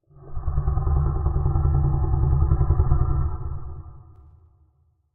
A monster growl I made.
Creature, Fantasy, Growl, Large, Monster, Roar, Snarl